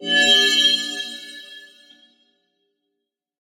shimmer synth 3
Shimmer sound made by synth, simulate metal rings and spell casting